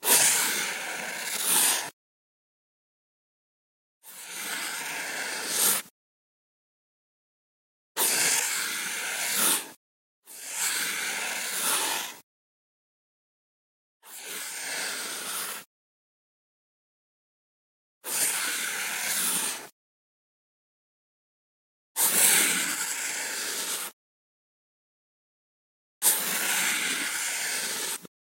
marker circelbeweging 2
marker cicel movement
draw, drawing, marker, pen, pencil, stift